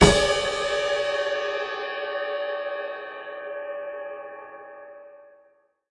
This Hit was recorded by myself with my mobilephone in New York.